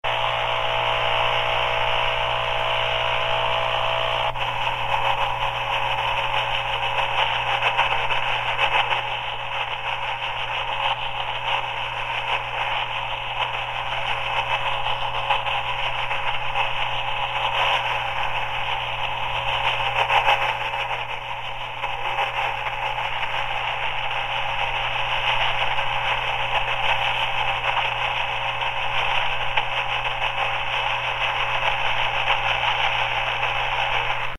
Static from a handheld radio